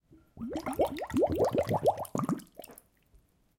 water bubbles 05
Water bubbles created with a glass.